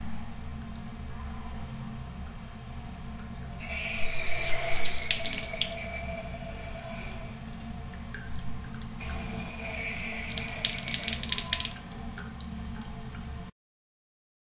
Water Faucet 2
hygiene, dental, cleaning, water